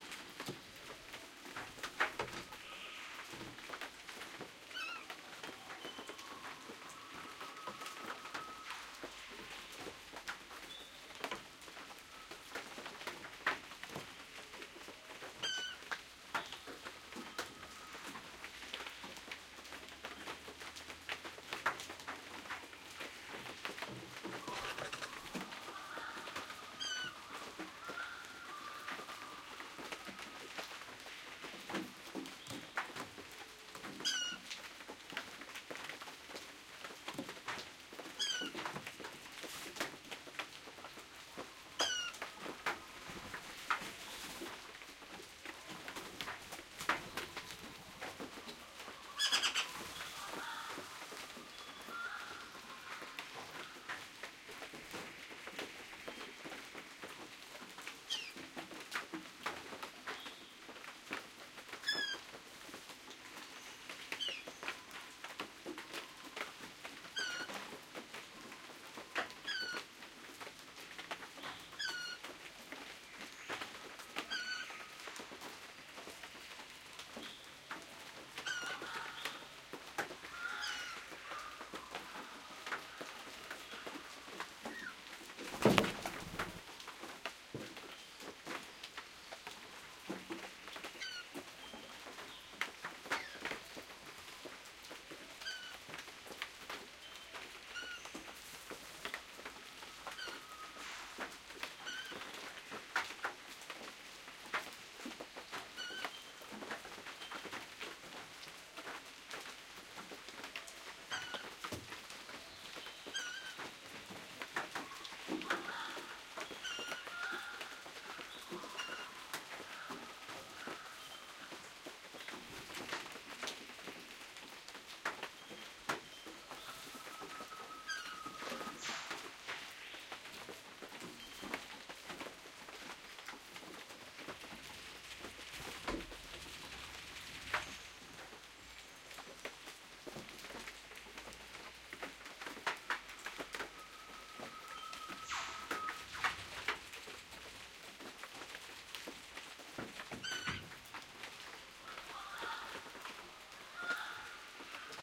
Rain in the Rainforest with Riflebird
It's raining and I have the recording rig under a large beach-umbrella. A female Riflebird wants to be fed some fruit and can be heard hopping about pestering and peeping. Recording chain: Audio Techinica AT3032 mics - Sound Devices MixPre - Edirol R09HR. Crater Lakes Rainforest Cottages
rain, ambiance, field-recording, ambiant, drops, riflebird, wet, birds, rainforest, birdsong, outdoor, crater-lakes-rainforest-cottages